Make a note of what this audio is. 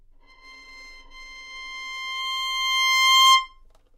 Violin - C6 - bad-dynamics
Part of the Good-sounds dataset of monophonic instrumental sounds.
instrument::violin
note::C
octave::6
midi note::72
good-sounds-id::3780
Intentionally played as an example of bad-dynamics
C6, good-sounds, multisample, neumann-U87, single-note, violin